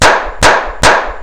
gun shots
My friend clapping